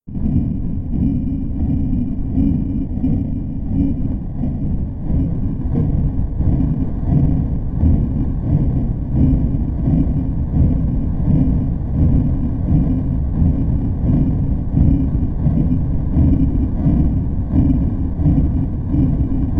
Deep, sonorous machine ambience
Modified from a recording of a bus engine. Deep, slightly staticky sound.